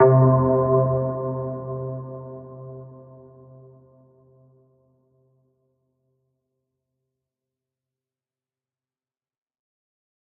SynthClass+VocoClear+RevbChatedral
FL, classic, electronic, fx, loop, studio, synth